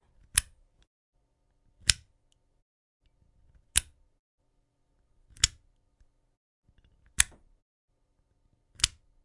Flipping a light switch. Recorded with the Neumann TLM-102. Enjoy!
flipping, light-switch, close, light, dry, switch, flip, field-recording, toggle